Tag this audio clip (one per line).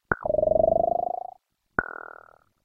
percussion
click
transformation